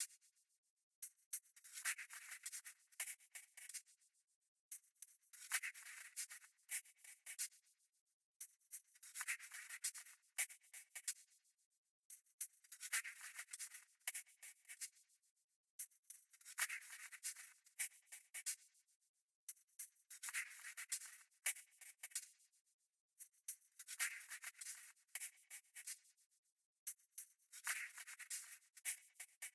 Crashes 130bpm
ABleton Live Synthesis
loop, drum